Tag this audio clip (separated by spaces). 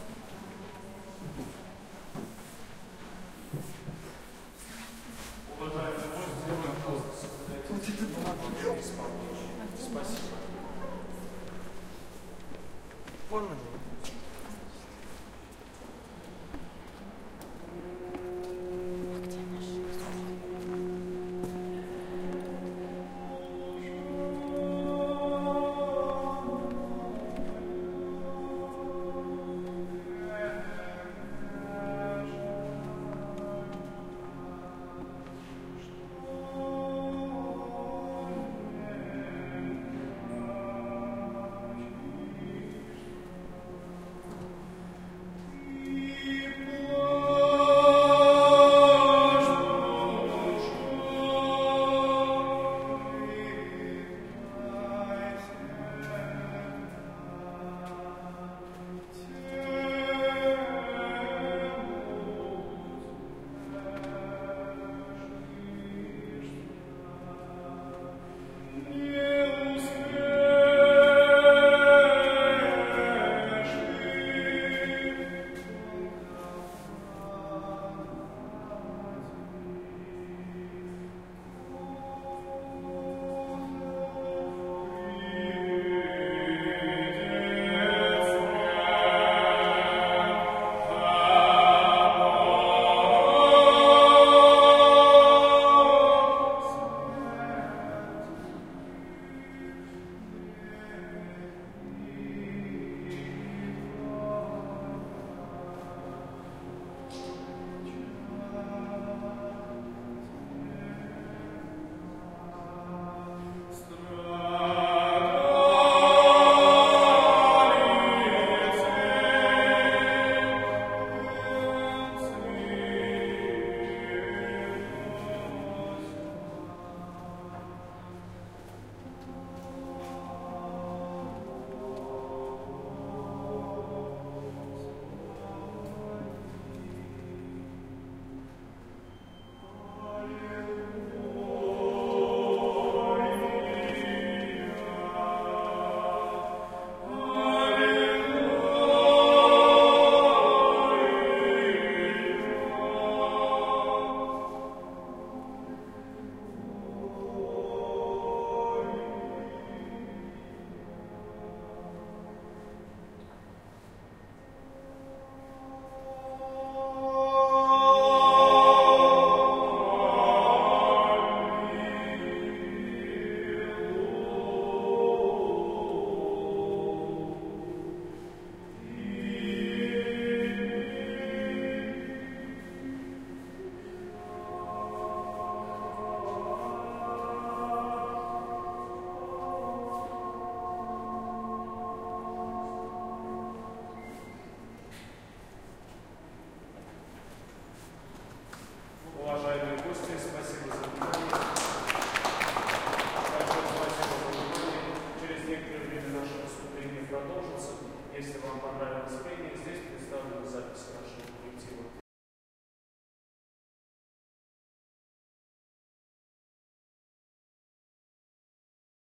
Russian ambience choir choral christian church crowd male-trio singing